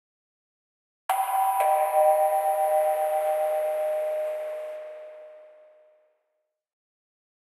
tannoy announcement jingle
This is a tannoy style "ping pong" jingle that often precedes an announcement
announcement, jingle